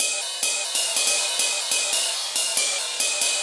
tinkerbell 140bpm
beat, bell, drum, drums, garage, hard, hihat, hosue, loop, riff, smooth, trance